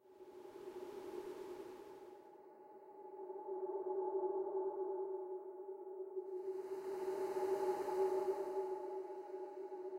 COLIN Nina 2014 2015 windandnight
This is a synthetic sound of 00:09 seconds generated with:
Risset drum : 224 Hz
Amp : 0 ,37
I used different effects in order to have this type of sound:
Repetition of the sound : 3 times
Noise reduction
Reflection
Tempo: decrease
Speed: decrease
Paulstretch effect
Cross fade in
Cross fade out
//Typologie (Cf. Pierre Schaeffer) :
Continu Complexe et Varié X & V
ambience, creepy, haunted, mystery, nature, night, phantom, spooky